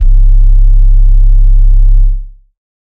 fake analog bass 1a
deep electronic bass sound
bass, electronic